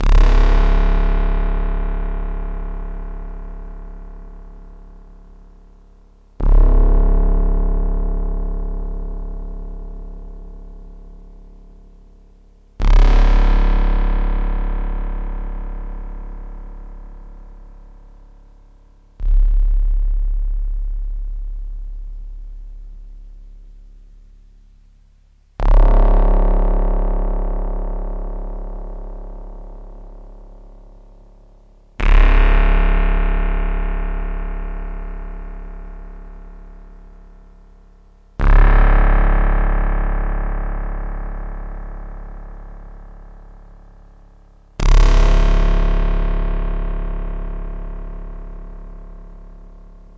Korg Monotribe analog synthesator sound effect